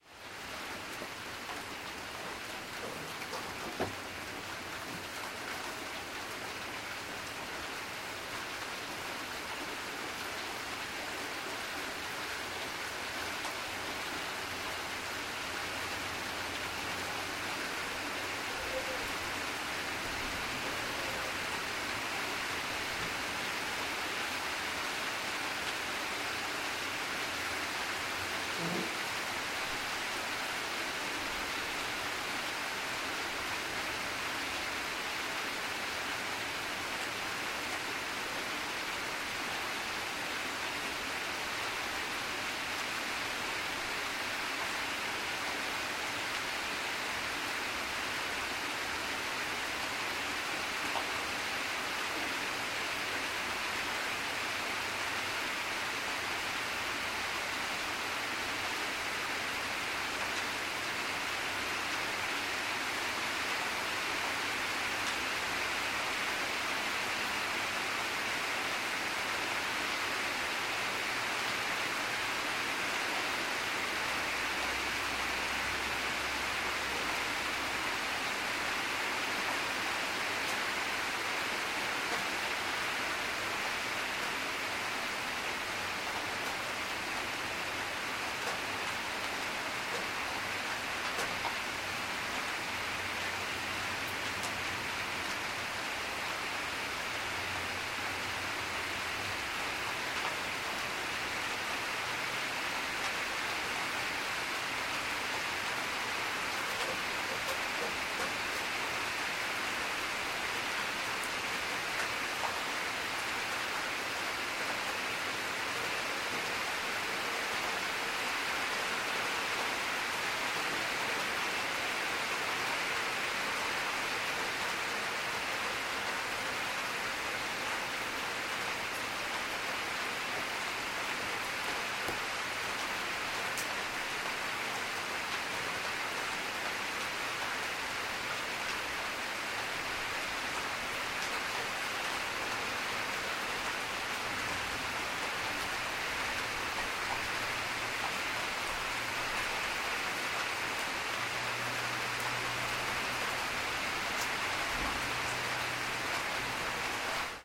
Ambience, Rain, Moderate, C
Raw audio of a moderate rain storm. This was recorded in Callahan, Florida.
An example of how you might credit is by putting this in the description/credits:
Storm, Raining, Normal, Water, Droplet, Ambience, Rain, Regular, Ambiance, Moderate, Shower